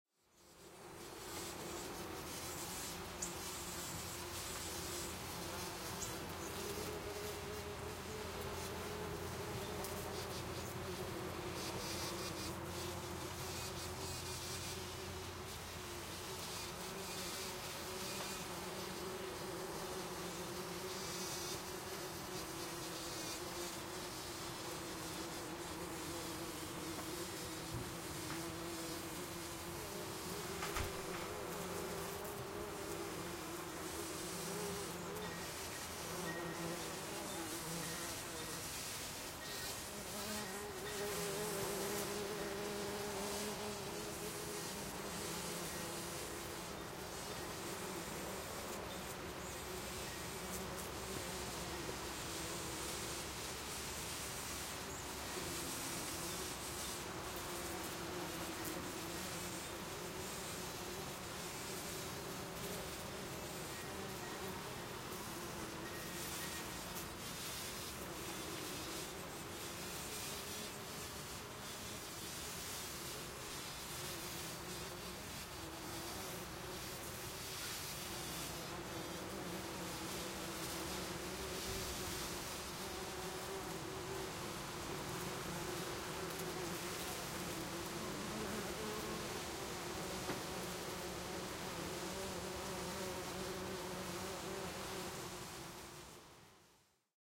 We have a rose bush full of bumblebees and bees.
I recorded the sounds and picked out a piece for you.
Eqipments used
Microphones 2 Line Audio OM1 and windscreens